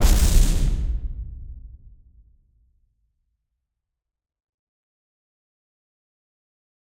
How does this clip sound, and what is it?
A short flame burst sound for use in all things combustible, for me I see it being used for background noises of furnaces or perhaps fire-related forms of industry. Mainly experimented with carbon dioxide expulsions out of cans, using plugin effects in different positions (as these change the input of those effects in the final mix - it can affect it in different ways).
burst, effect, explosive, fire, flame, heat, sfx, soda, soft, sound, temperature, warm, wispy